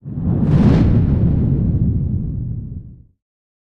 Planetary Flyby faster
A large object moving past, a bit faster, low end rumble
big, deep, pass, rumble, space